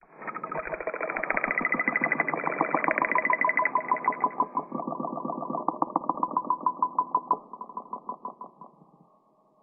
wood bird 2
wood sample set transformation
wood, percussion